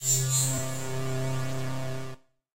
Tweaked percussion and cymbal sounds combined with synths and effects.
Beam, Abstract, Percussion, Sound-Effect, Stream, Phaser, Laser, Space